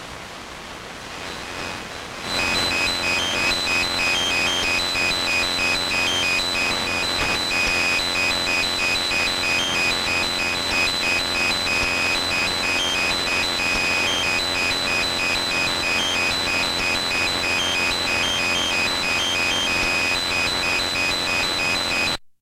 AT&T Cordless Phone off Charger motionless 1700 KHZ
Electro-magnetic interference from an AT&T; cordless phone handset CL82301 when held near the internal Ferrite antenna on the back right of a 13-year-old boombox at 1700 KHZ in the AM broadcast band. Recorded with Goldwave from line-in. You hear a series of beeps shifting between one of 3 tones. These are the fastest and highest pitched standby tones I've come across with this phone. The higher AM frequency picks up an extra buzz not there at lower frequencies.
noise, am-radio, radio-interference, t, beep, hum, sequence-of-tones, electro-magnetic, EMI, EMF, electronic, digital, lo-fi, cordless-phone, buzz, pulse, tone, interference, glitch